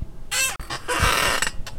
One of three chair squeak noises I recorded three years ago for a radio drama project. Probably best for somebody turning or spinning in their chair.